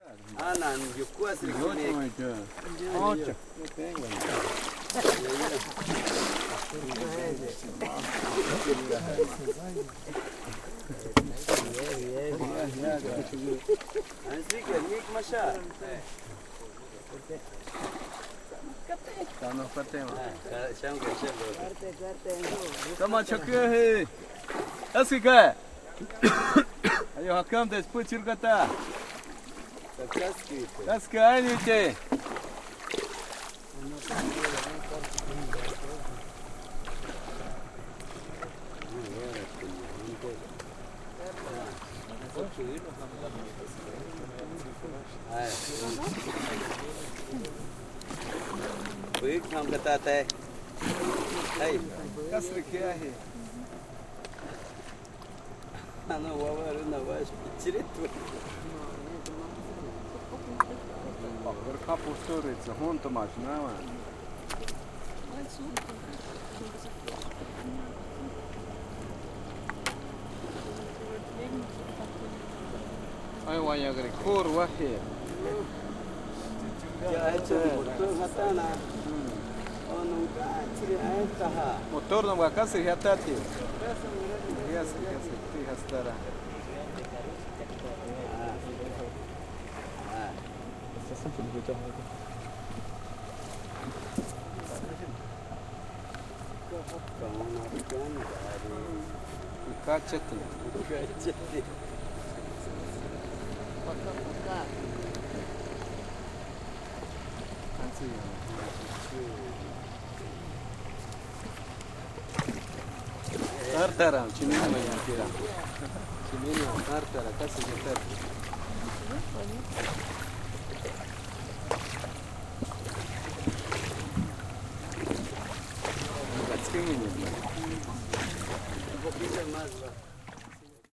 Recorded in 2011 on the documentary "Arutam" project. With a Rode Stereo XY mic thru a Boom. This one was recorded on a float doing a little swimming with piranias :)